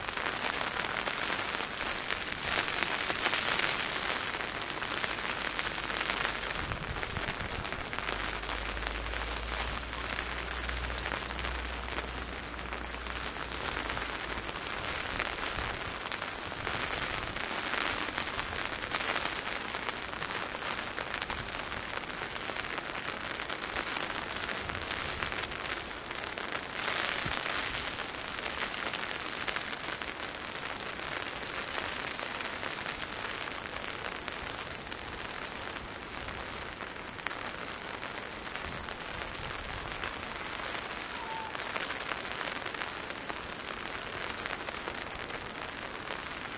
raindrops.umbrella
binaural recording of thin rain falling on my umbrella. Soundman OKM mics > iRiverH120(Rockbox) /lluvia fina cayendo sobre mi paraguas. Binaural.
binaural, rain, umbrella, water